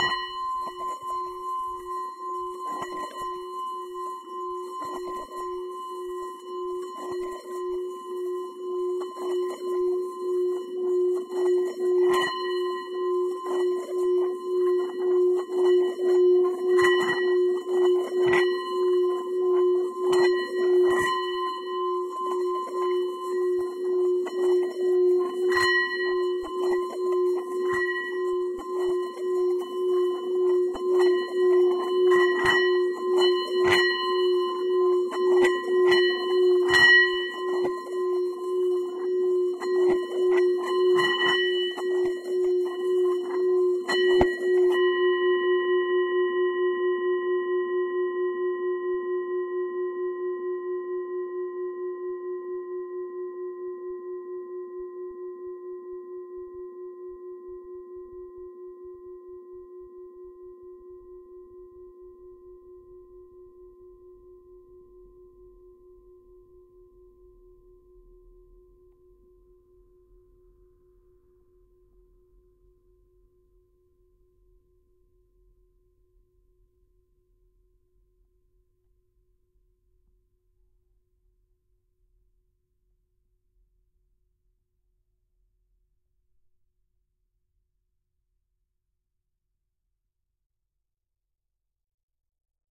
Tibetan Singing Bowl 14 cm (Rub)
14 cm Tibetan singing bowl being resonated. Recorded using Sennheiser 8020s.